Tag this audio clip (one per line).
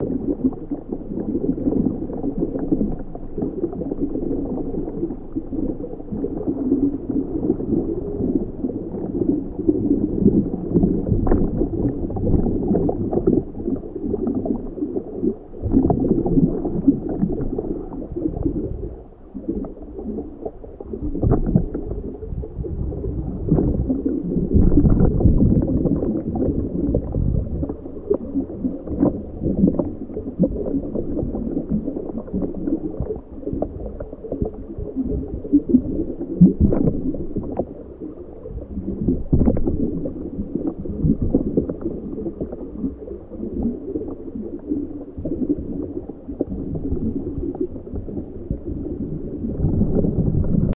bubbles
deep
diver
diving
glug
gurgle
scuba
stereo
underwater
wet